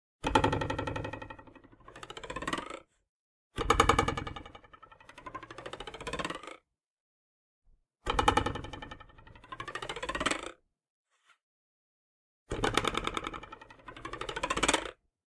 Cartoon 4'' Boing w. modulation
Cartoon Boing Sound created with a ruler. The 101 Sound FX Collection